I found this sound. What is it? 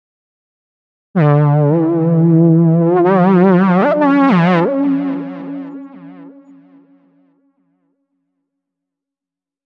A short melody with a spacy weird synth-sound. Made it in GarageBand for something called Victors Crypt. This would fit something alian-like, sci-fi I think.
Scary, Creepy, Spooky, Ambient, Ambiance, Alian, Synthetic, Horror, Haunting, Machine, Unusual, Monster, Wicked, Machinery, Original, Cinematic, Weird, Outer, Free, Film, Sci-Fi, Haunted, Ambience, Eerie, Alien, Space, Strange, Factory